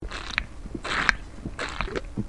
Person sipping a drink. Recorded with uni-directional mic in small room with little background noise.